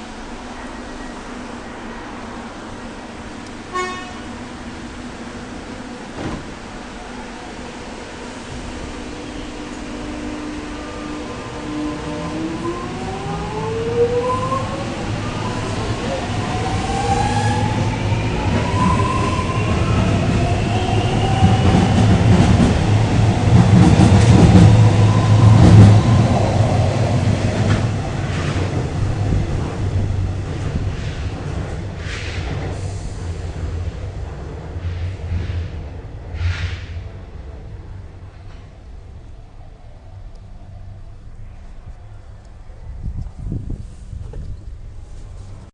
A field recording at Parliament station in Melbourne, Victoria. You can hear an Xtrapolis train depart